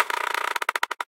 Wheel Spin Click Slow Down Fast
Similar to a wheel from a game show slowing down, but faster
This sound is remixed from: mialena24
click, decelerate, down, Fast, slow, slowing, spin, Wheel